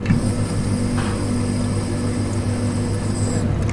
017 fountain pump
This noise is a recording of the sound of a fountain for drink in a corridor from tallers from UPF campus in Barcelona.
It sounds like a motor.
It was recorded using a Zoom H4 and it was edited with a fade in and out effect.
campus-upf, fountain, motor, noise, pump